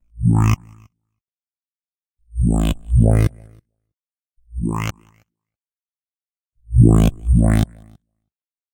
Dubstep Wobble #1
Dirty dubstep-style wobble made with Minimonsta VSTi. cheers :)
bass, delay, gritty, reverb, wobble